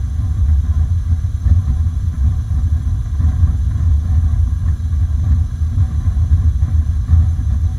hotwater heater 2
Another recording of my gas powered hot water heater.
gas, heater, hot, industrial, machine, water